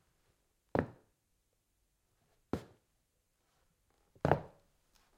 heavy-hitting-foot-steps-on-wood-floor
Some footsteps I recorded for "Batman" in a short film I did the Foley for
Stay awesome guys!
foot, stepping, walking, walking-along, wood-floor